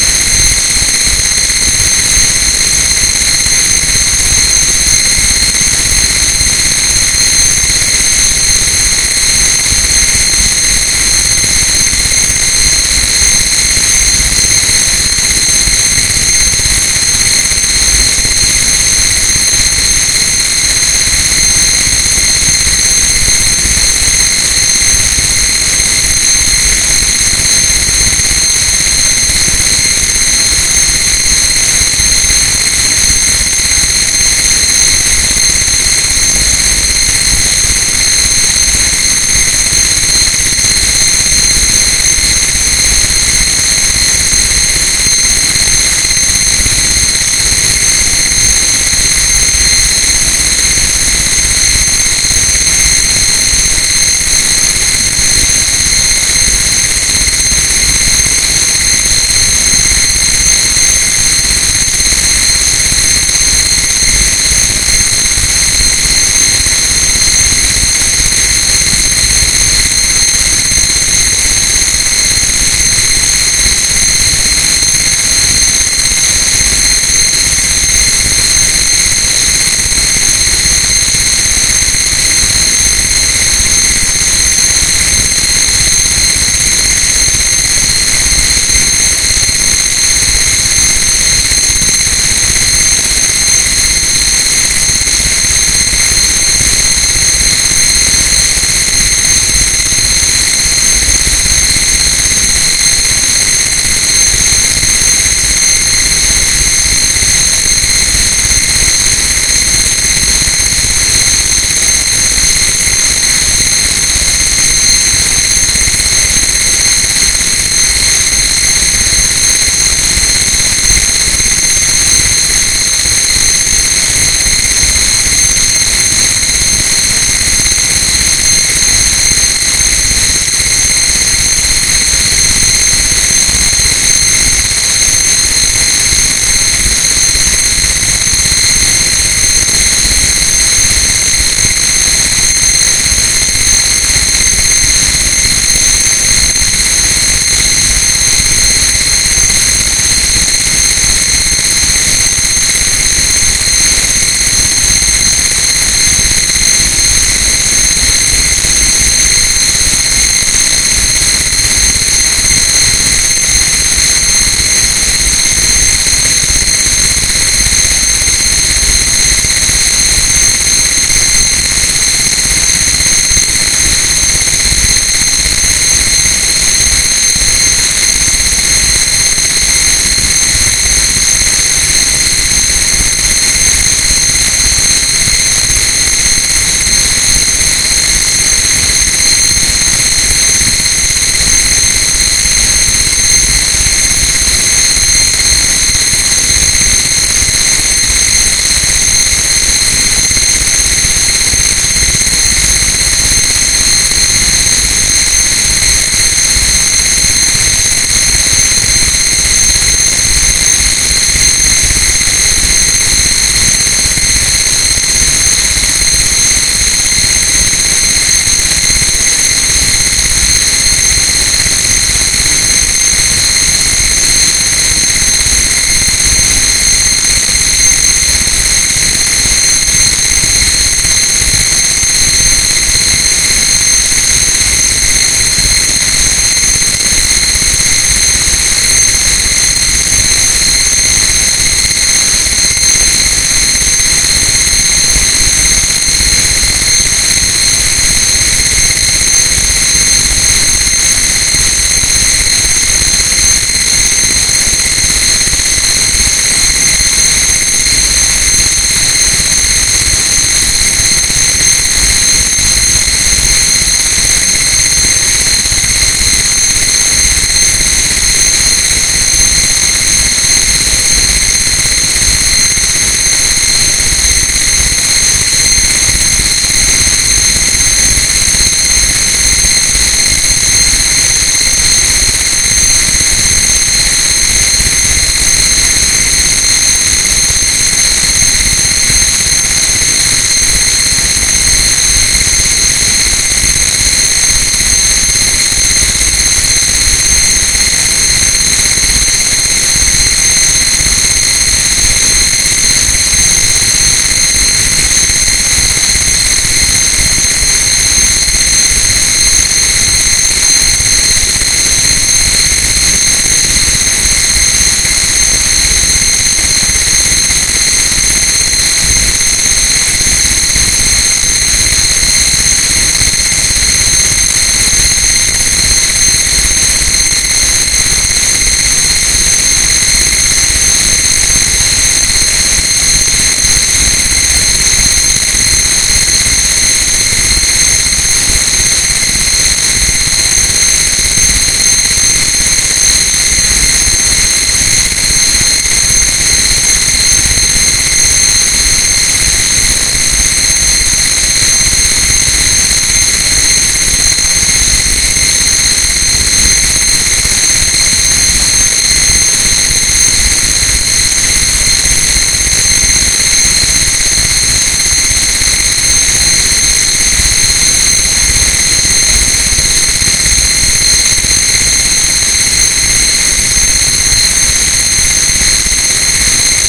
jump-scare
made in audacity very loud jumpscare
jumpscare, loud, screamer